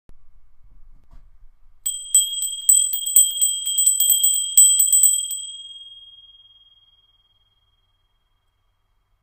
Bell, ringing, ring
ring, ringing, Bell